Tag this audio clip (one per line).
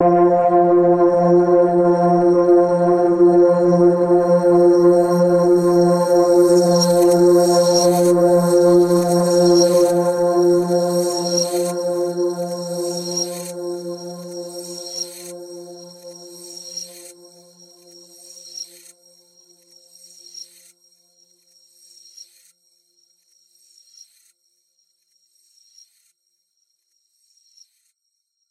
ambient
dark
granular
multi-sample
multisample
synth